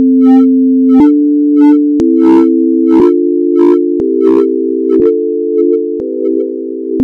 The first song is a try. On the first track a do, ré, mi fa sol, la and a wahwah effect on the second track. This track with a ending fade and a repeat, aims to be weird and can be repeatable worthy of the B Movies.
This kind of track can be classified as soundtrack.
effect, sountrack-wahwah, Movies-sound, try, effect-B